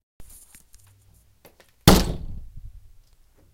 Hit of a door .